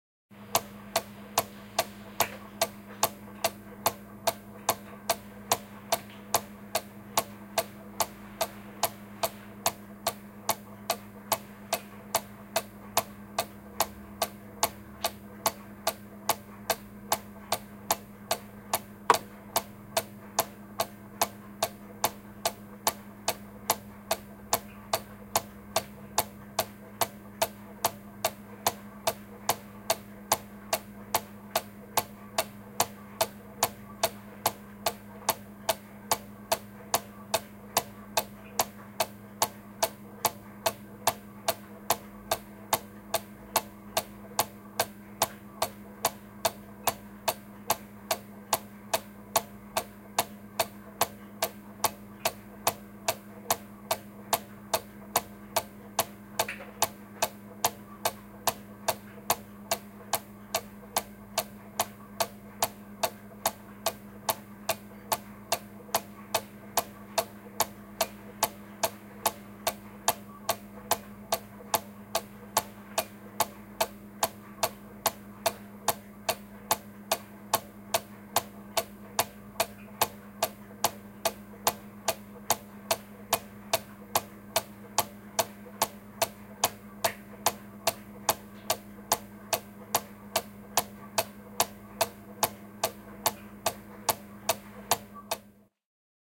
Käkikello, kello, tikitys / Cuckoo clock in a room, ticking
Käkikello, kello käy, tikittää huoneessa.
Paikka/Place: Suomi / Finland / Nummela
Aika/Date: 01.01.1992